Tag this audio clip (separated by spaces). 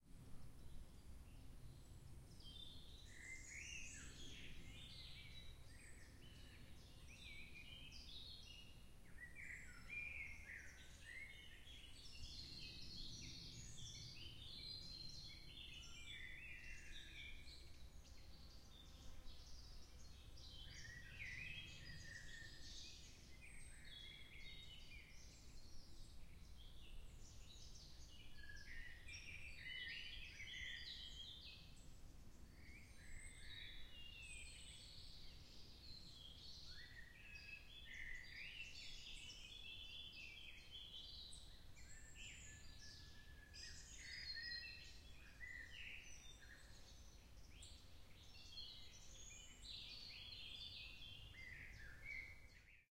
forest insects birds summer field-recording ambiance